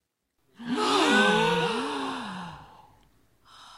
Two people gasping in astonishment. Recorded with SM58 to a Dell notebook with an audigy soundcard.